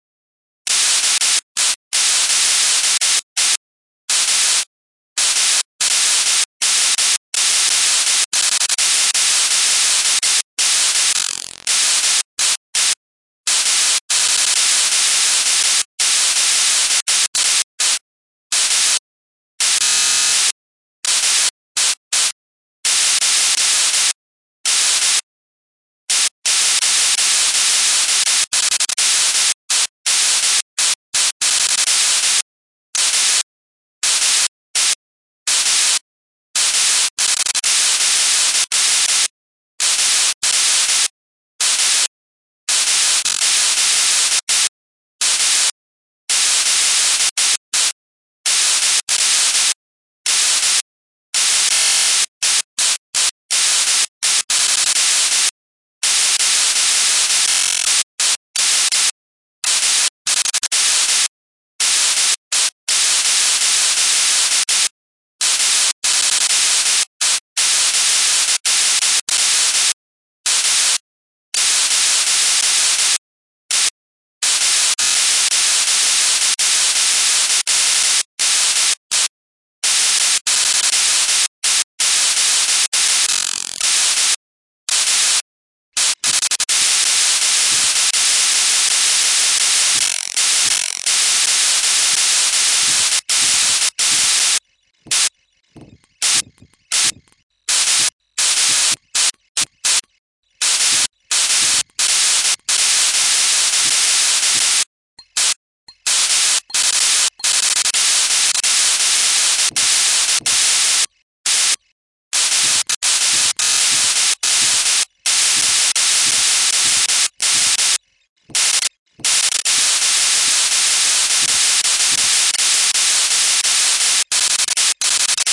WhiteNoise BBCut
I processed a "bar" of WhiteNoise in Logic an then crumbled it through the BBCut-Library with SuperCollider.
bbcut, beat, hard, noise, processed, random, rhythmic, supercollider, white-noise